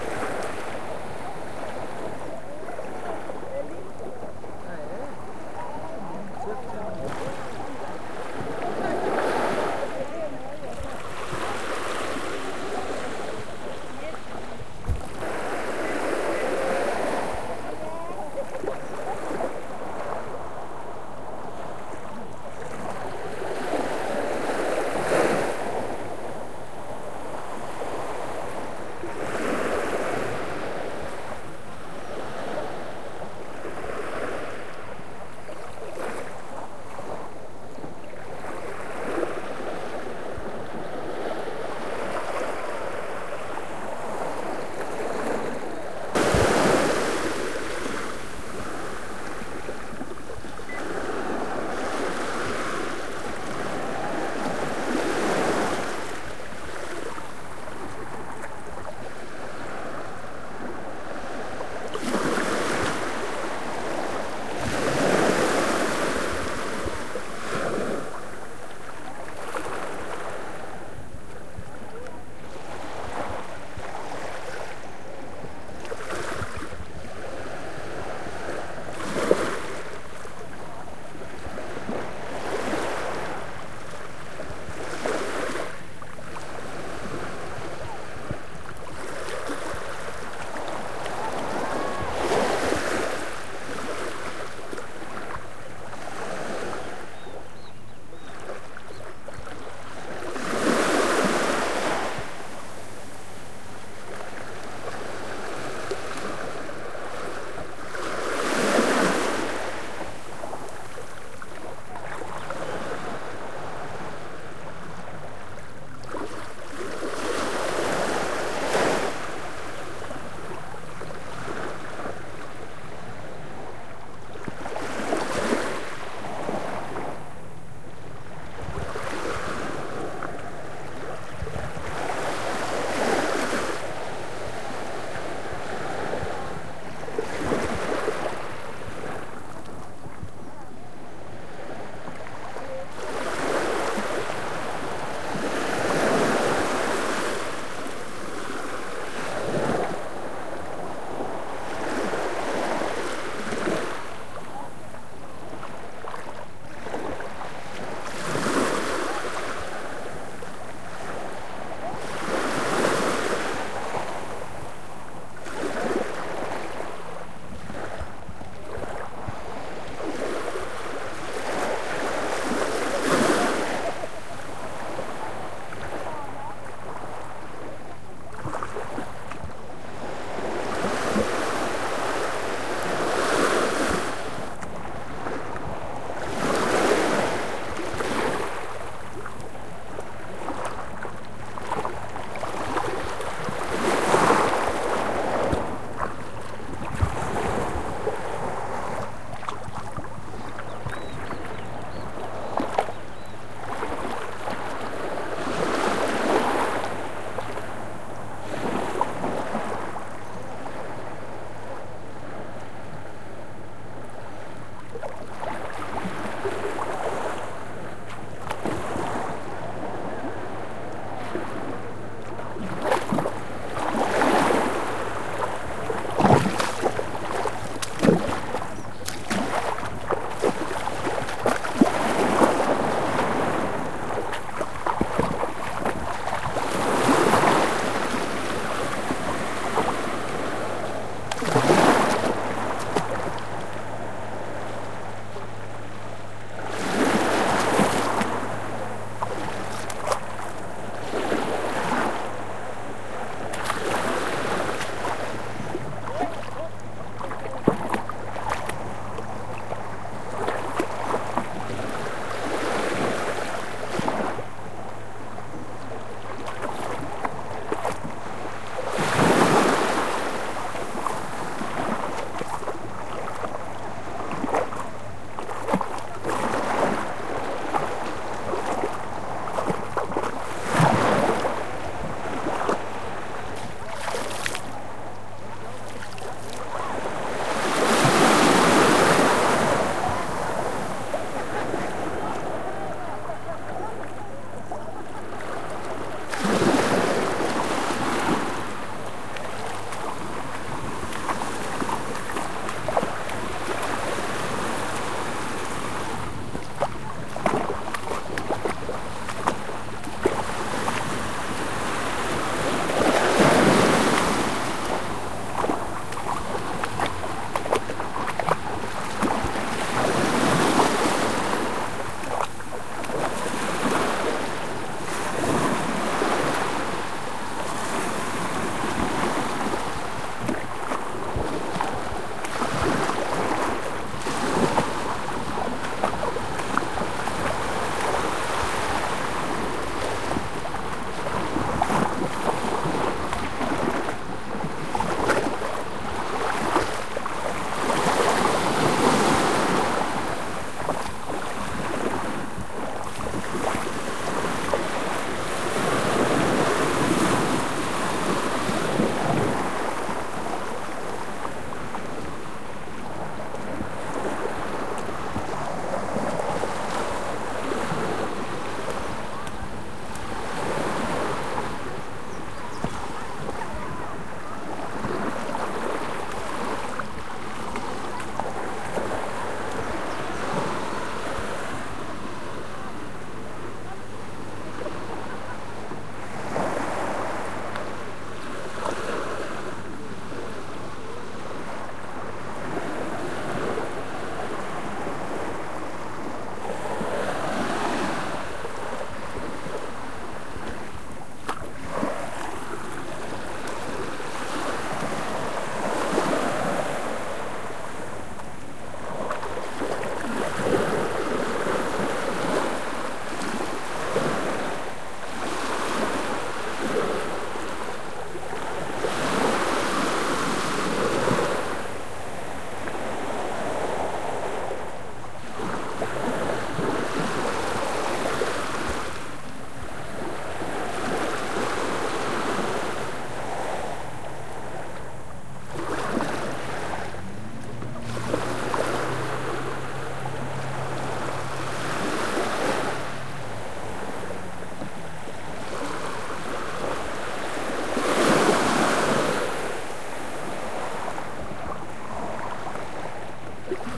beach long01
Recording taken in November 2011, at a beach in Ilha Grande, Rio de Janeiro, Brazil. Recorded with a Zoom H4n portable recorder, edited in Audacity to cut out undesired pops and clicks.
Mainly at the beginning, people can be heard talking and laughing in the background. Later, I walked away to record only the sea without people chattering. Throughout the recording, there are some splashes that maybe can pass off as someone swimming...